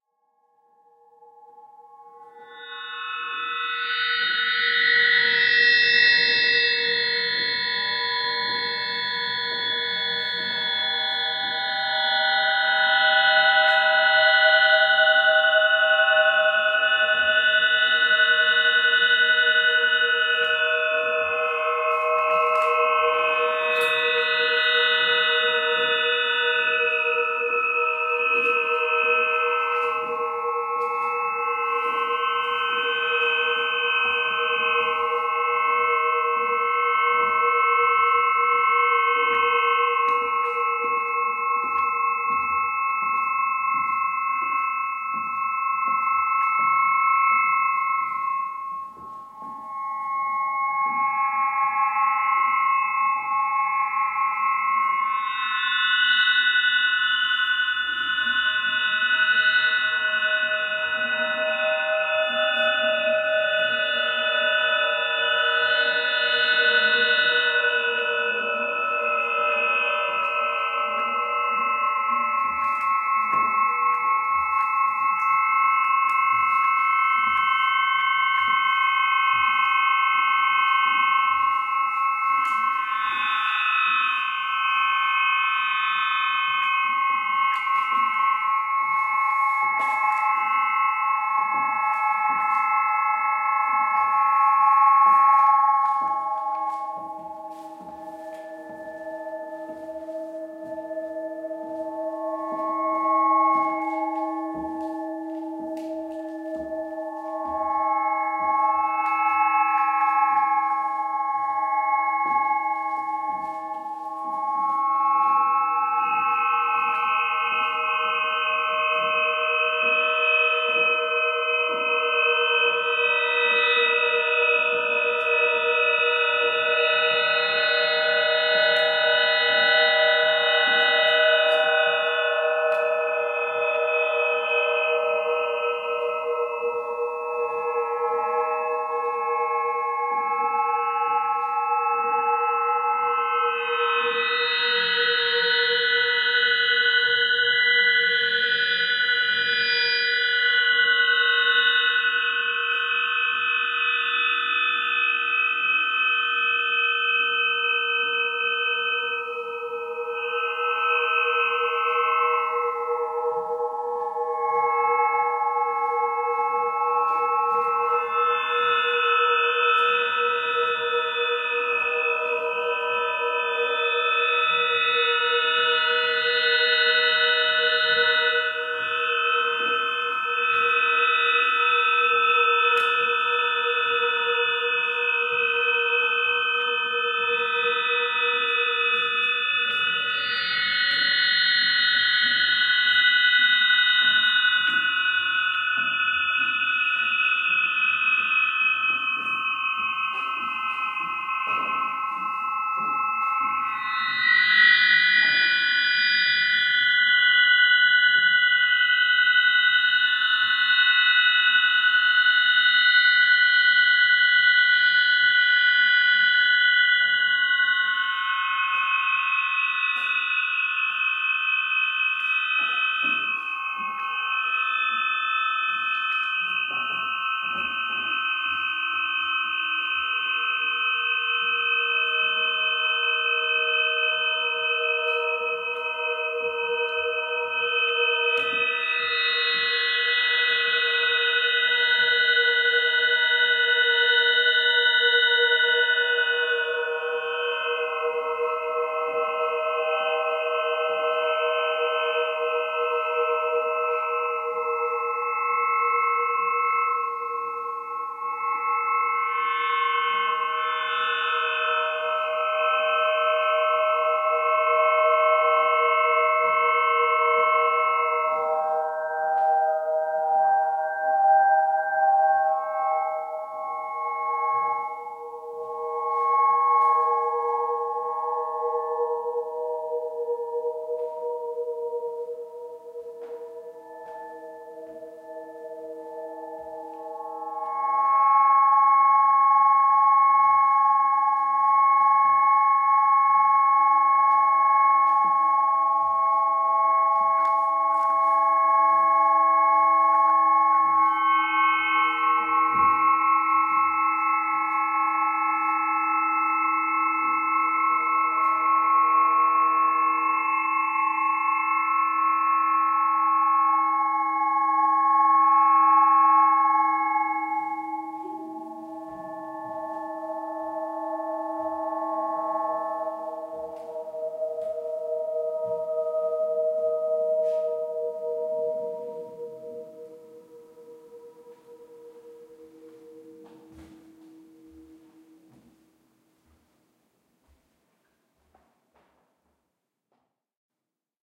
dreaming SMETAK - 30.08.2015; ca. 16:00 hr
"dreaming Smetak" is a sound installation for 36 microtonal, aeolian, acoustic guitars based on the original idea and concepts of the composer Walter Smetak (1913-1984). It was a commission of the DAAD Artists-in-Berlin Program for the mikromusik - festival for experimental music and sound art. It took place in the attic of the Sophienkirche in Berlin and was opened for visitation between the 27th and 30th of August 2015.
For this version of it, 18 acoustic guitars were used as active sound sources while other 12 served as loudspeakers – mounted with small transducers –, and the remaining 6 were simply placed as visual objects in contrast to the ironmongery structure of the attic ceiling of the church. The first group of guitars were equipped with contact microphones attached to specially designed preamplifiers. Their sound actor was only and solely the wind.
sound-art, Walter-Smetak, sound-installation, acoustic-guitar, Aeolian-sound, Aeolian-harp